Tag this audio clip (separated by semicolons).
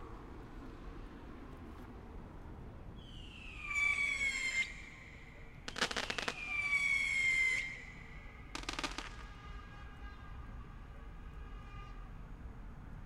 beats; click; fire; noise; sparklers; fireworks; pops; crackle; firecrackers; fourth-of-july; pop; clicks; outside; glitches